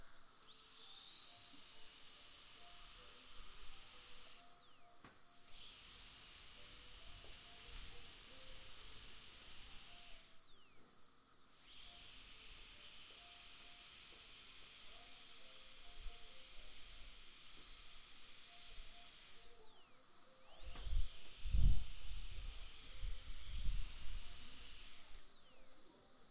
Dental Handpiece
Dental, Handpiece, Sound
Handpiece, Sound, Dental